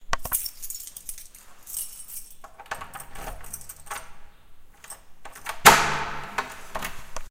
Lock Door Open, key,
Open, Door, Lock